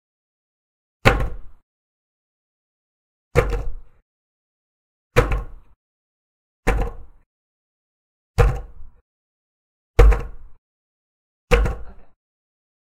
dropping a longboard on a carpet floor
MUS152, carpet, dropping, floor, longboard